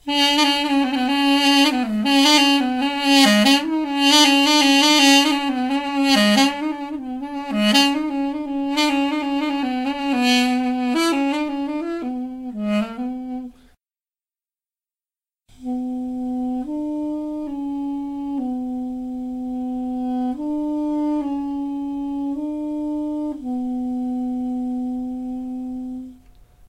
arousal-high-low
Armenian themes by Duduk - Armenian double-reed wind instrument
Recorder: Zoom H4n Sp Digital Handy Recorder
Studio NICS - UNICAMP
armenia, duduk, tema